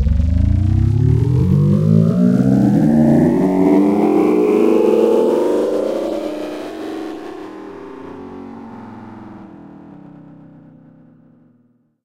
Another sound made from a snippet of a human voice mangled in Cool Edit 96 to simulate a donkey being teleported away.